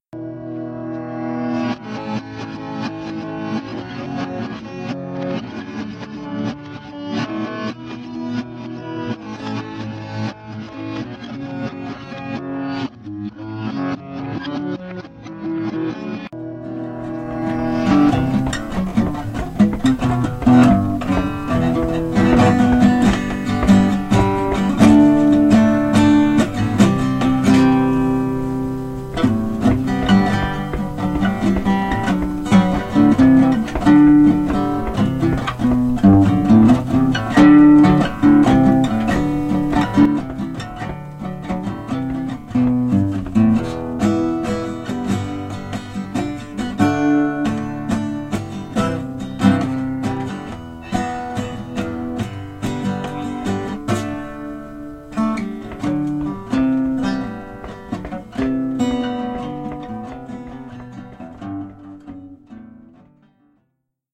IN thru the window
reversed, Electric, acoustic, rock, splat, guitar